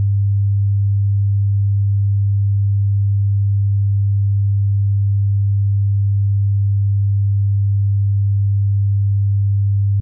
100hz sine wave sound
wave, 100hz, sound, sine